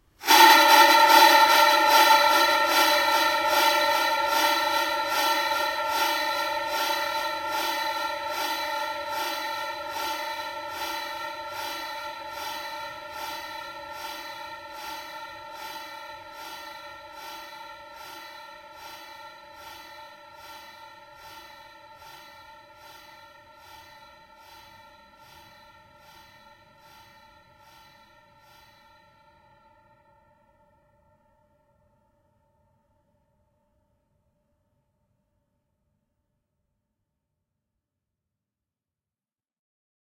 Delayed Cinematic Bell 2
An echoing climax effect with multiple filters applied.
Delay and reverb added in Audacity.
echo, delay, epic, climax, ring, effect, cinematic, bell, trailer, ringing, transition, reverb